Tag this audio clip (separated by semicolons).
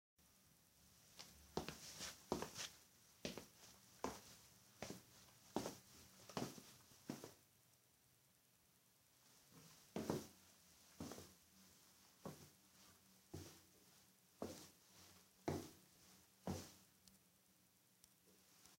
cartoon; effect; soundesign; Steps